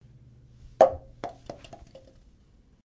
plastic cup falling onto floor
Plastic solo cup falling and bouncing on the carpeted floor.
falling, floor, plastic